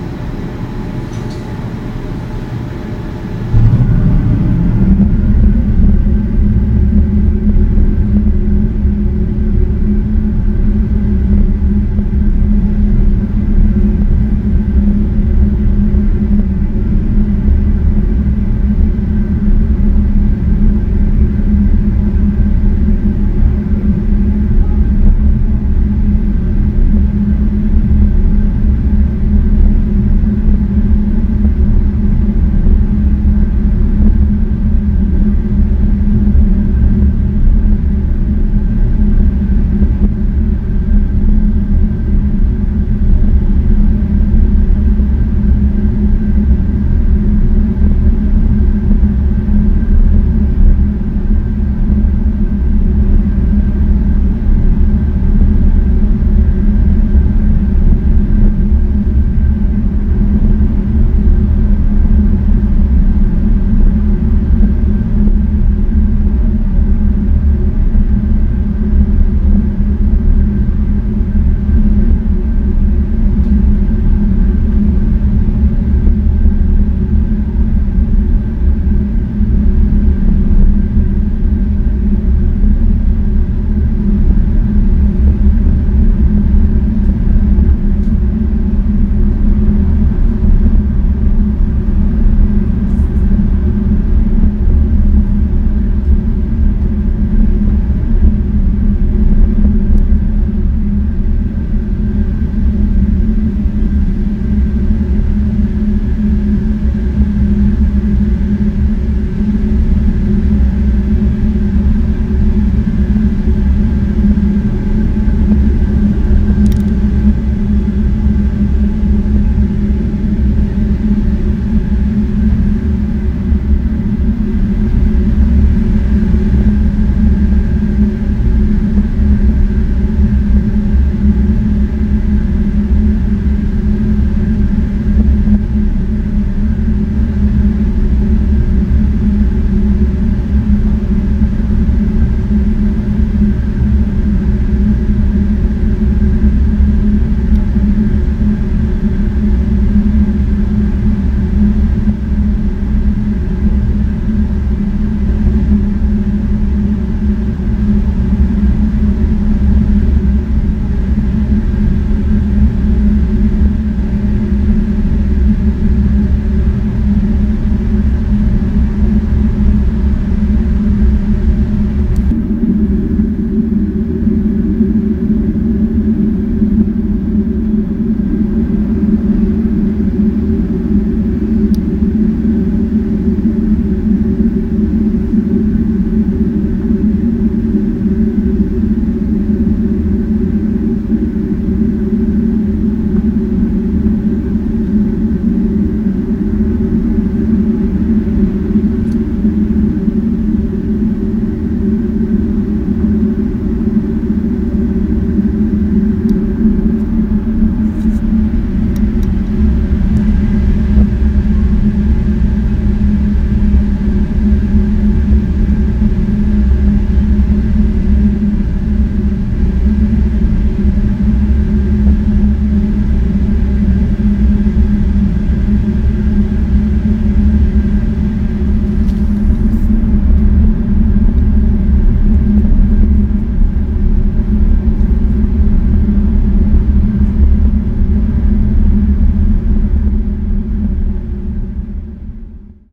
central heating boiler from the 5th floor
Central heating boiler switching on and working for about 4 mins,recorded from the 5th floor of my appartment with sennheiser mkh-416, shure fp 33 mixer into a mz-r410 mini disc.
Very low frequency recorded in high levels,two maybe three different mic placements,enjoy...
energy, 416, minidisc, high, lowfrequency, 33, mkh, fp, boiler